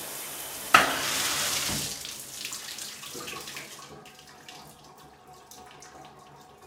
turning off shower
class, intermediate, sound